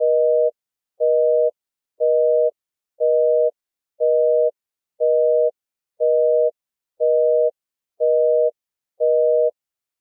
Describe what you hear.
Busy Tone
Sound generated when you call a phone number that is already in use. Created from scratch using signal generators.
Busy, Busy-Tone, Phone, Phone-Call